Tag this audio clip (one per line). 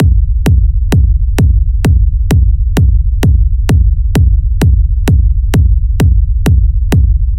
club
dance
drop
edm
effect
electro
electronic
free
fx
house
loop
rave
sample
sound
techno
trance